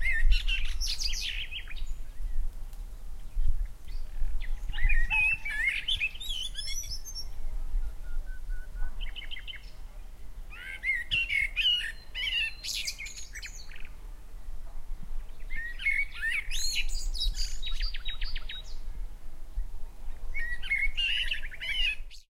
Birds singing in the middle of the spring.
singing, birds, ambience, nature, Bird, field-recording, forest, trees, spring